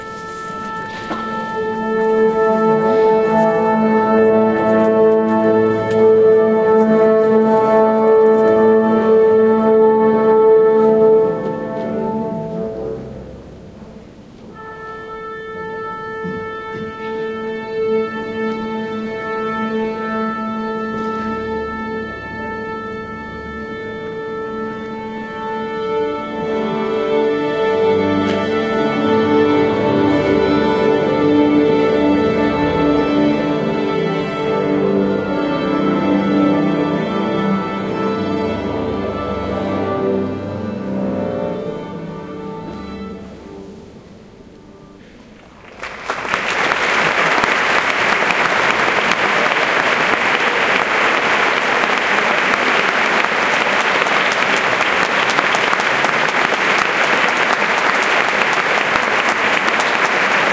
20100213.tuning.ovation
musicians tuning their instruments (symphonic orchestra), then silence, then welcoming ovation. Olympus LS10 internal mics.
ambiance, concert, murmur, music, performance, stalls, theater